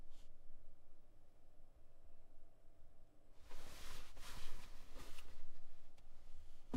clothes movement foley
clothes, movement, foley